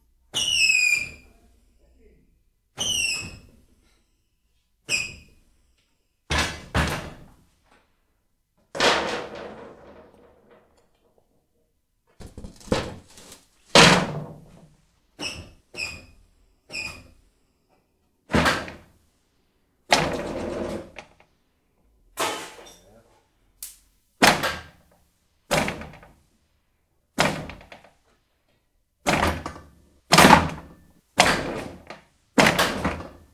Some sounds I made with the car elevator and metal rubbish lying around.